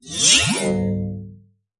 BS Bend 3
metallic effects using a bench vise fixed sawblade and some tools to hit, bend, manipulate.